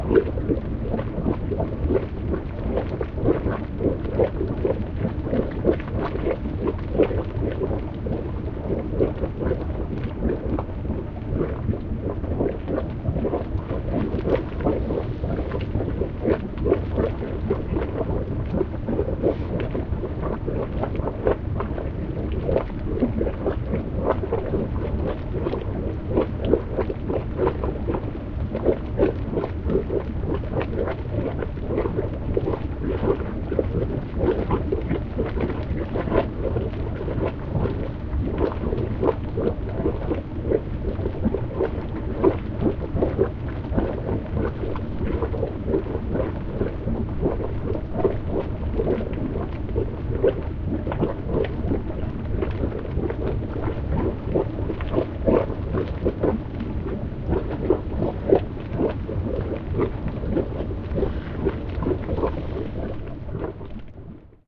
toxic area
Processed version of sample
to make it sound thick and dirtier. Its th sound of a sulfur hotspring with pitch changed